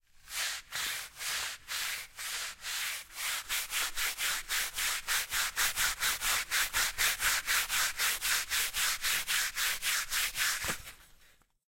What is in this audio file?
acceleration brush
housework, house, cleaning